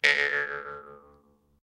jaw harp20
Jaw harp sound
Recorded using an SM58, Tascam US-1641 and Logic Pro